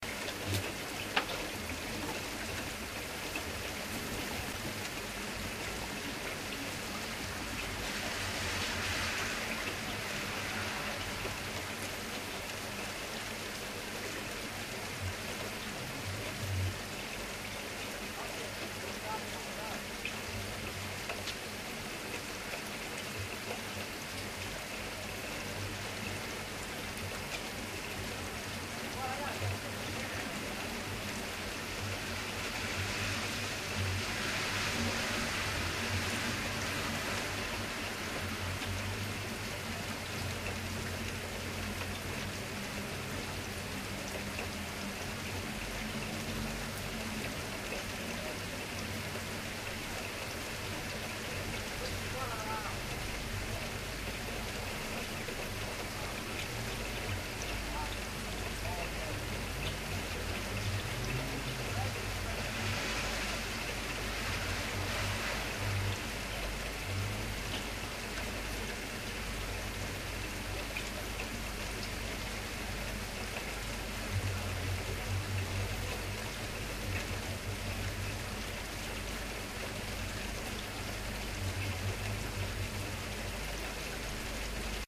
Rain on Window
A short clip recorded with a Samsung R10 camcorder. I placed my camera on the window ledge. It picked up sounds coming through my headphones, with a little bit of editing it can be clean though.
rain-on-window, heavy, rain-hitting-window, window, rain, cars-going-past